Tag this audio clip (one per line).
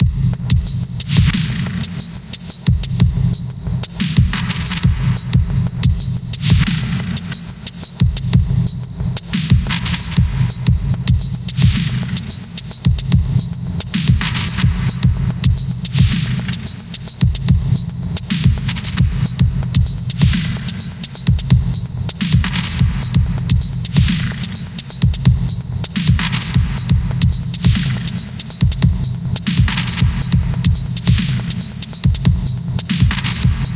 loop
beat
128bpm
140bpm
electro
glitch
110bpm
dance
dubstep
club
free
trance